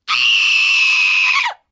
A scream of a girl for a terror movie.